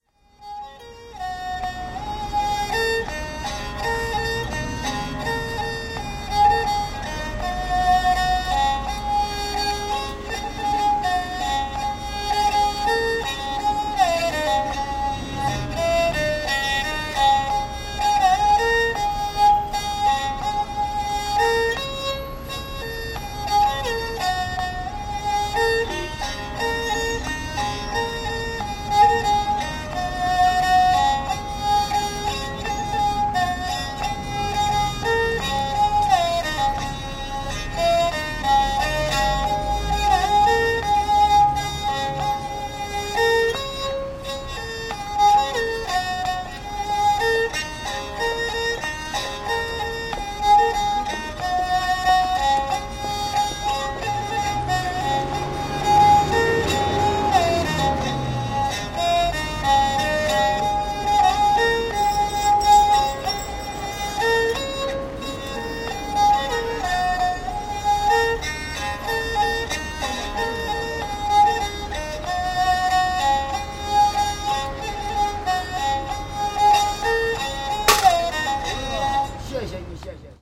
Chinese Violin - The Enchanted Sound of the Erhu
In Beijing's Donghuamen area, a blind man plays the Erhu (二胡) -mostly known as Chinese violin- on the sidewalk of Donganmen street -the street that connects with the East door of the Forbidden City.
A two-stringed bowed instrument, the Erhu produce one of the most characteristics sounds of China.
Transit noise behind, this direct recording was made with my old ZOOM. At the end, after a coin hit the can of the street musician, the man said, 谢谢 [xie,xie], thanks!